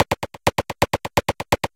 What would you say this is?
I always liked this loop, but I could never figure out the bpm or time-signature. Could be useful as a "gallop" if you could synchronize it with anything.